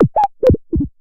j3rk dual mirror core modular
core, dual, j3rk, mirror, modular